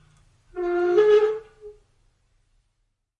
metal crank groan
crank, groan, metal